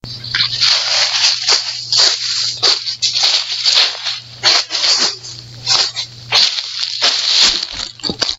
Crumpling Leaves
Leaves, rustling, Walking